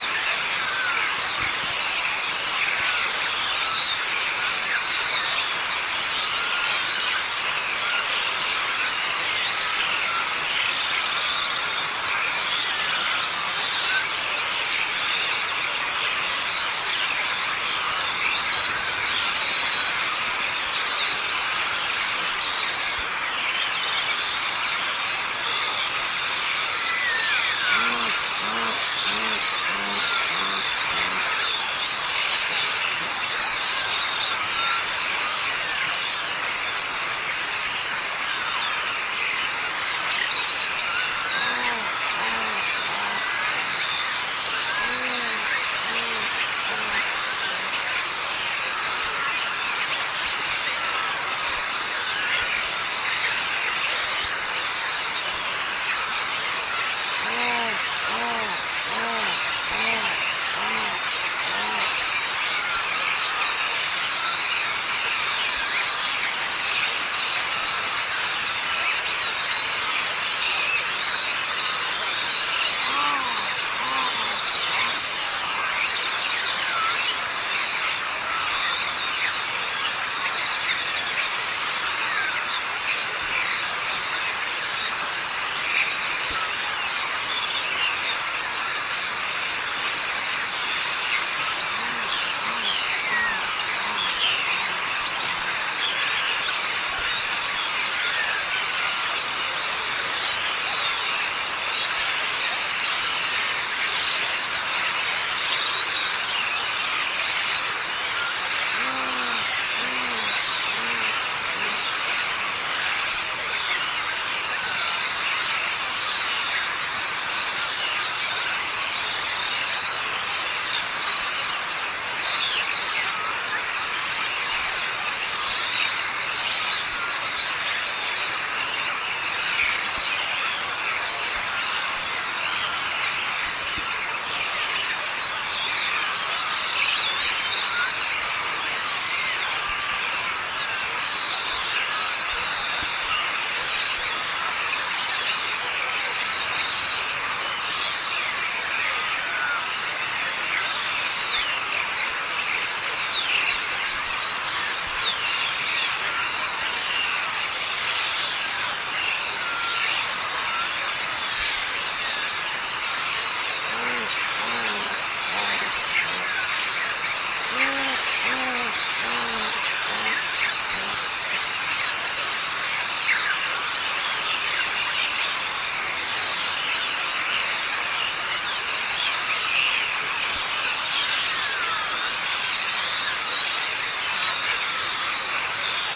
Sound has been pitch/tempo shifted by 1 octave down (1 octave = 2 times; by changing the sound 1 octave down, you get 2x slower tempo and 2x lower pitch) and a touched little bit by EQ to make the sound clearer and more natural. Can be used as a sonic texture or a special effect or for educational purposes - to uncover the unheard world, that emerges when you change your octave of hearing.
nature, birds, field-recording, processed